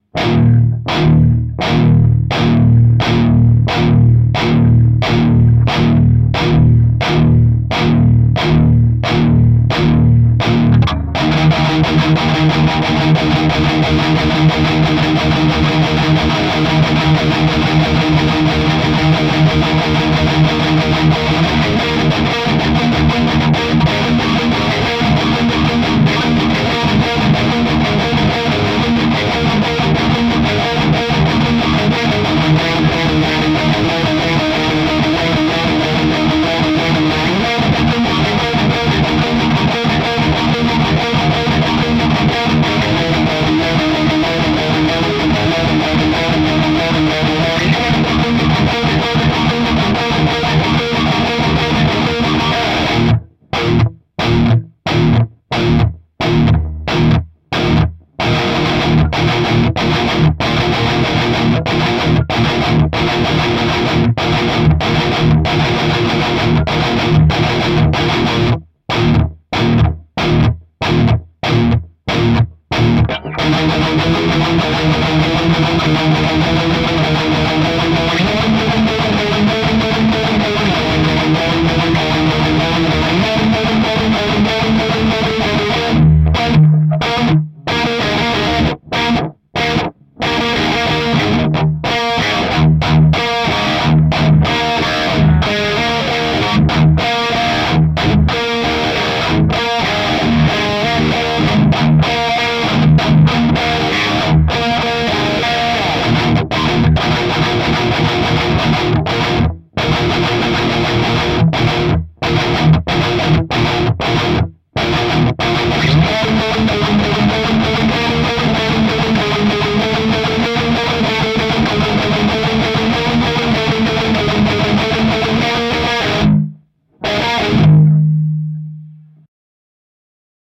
Electric guitar, high gain, heavy chugging type of riffs and some random riffing
thrash, rock, chug, heavy, palm, electric, overdrive, guitar, distortion, metal